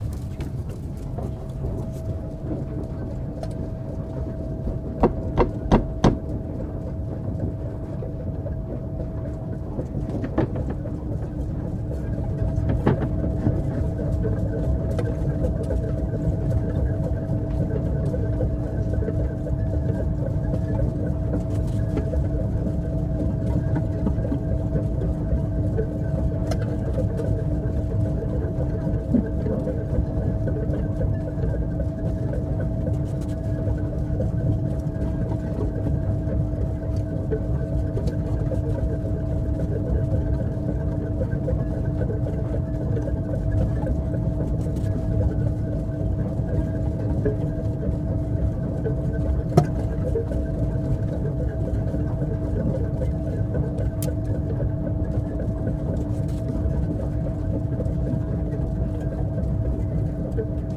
SE MACHINES MILL's mechanism 08
One of the machines in watermill.
rec equipment - MKH 416, Tascam DR-680
industrial, machine